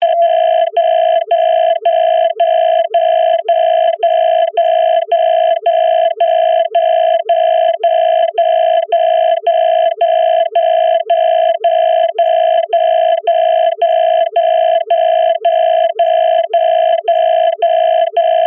clock ringing
sound made in ableton with time warping utility and some aditional efects